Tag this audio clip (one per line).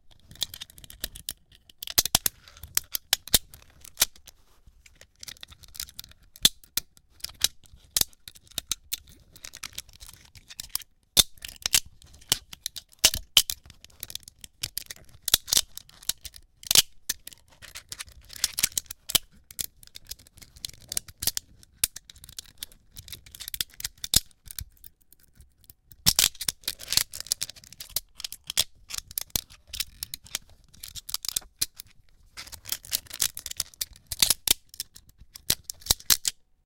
toy
clatter
magnetic
clacking